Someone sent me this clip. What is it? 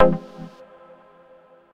TECHNO ecco key
techno, noise